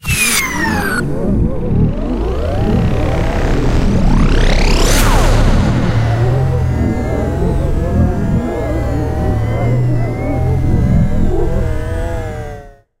Morph transforms sound effect 32
rise, glitch, impact, opening, futuristic, transformer, metal, scary, moves, noise, metalic, transition, drone, abstract, horror, transformation, dark, background, woosh, morph, Sci-fi, destruction, stinger, atmosphere, game, cinematic, hit